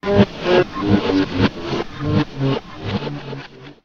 This sound is based on a guitar record. I exported one small part of the record, I inverted it and added a "wahwah" effect on it. To finish, I changed the volume with the "amplification" effect and I slowed down the sound.
guitar, e, invers